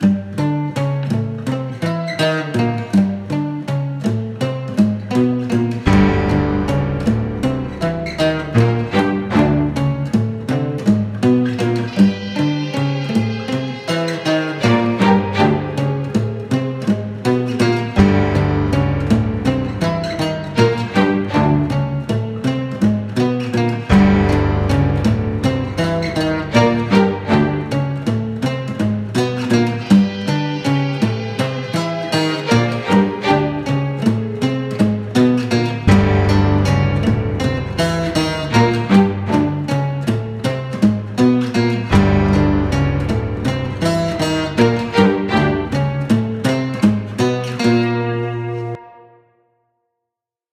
Spooky music
Music I made in GarageBand for something called Victors Crypt. The original idea was this melody I made on acoustic guitar. Then I addded strings to make it more spooky and a bass-note along with a piano-chord to make it sound more powerful. Hope you like it
Instrumental, Crime, Creepy, Monster, Strigs, Scary, Violins, Sci-Fi, UFO, Atmosphere, Alien, Mystic, guitar, Space, Effect, Spooky, Acoustic, Murder, Fiction, Frightening, Intro, Ghost, Strange, Mystery, Amient, Free, Piano, Spacecraft, Scifi, Outro